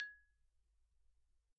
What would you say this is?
Kelon Xylophone Recorded with single Neumann U-87. Very bright with sharp attack (as Kelon tends to be). Cuts through a track like a hot knife through chocolate.